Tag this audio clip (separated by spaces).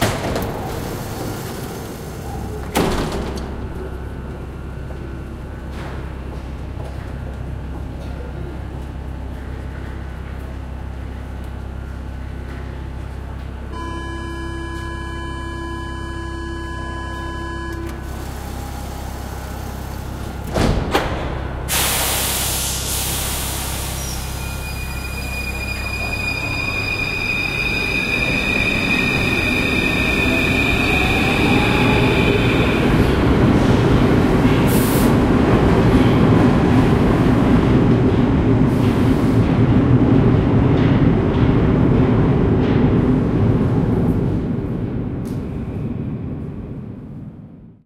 Paris-subway metro doors underground subway field-recording tone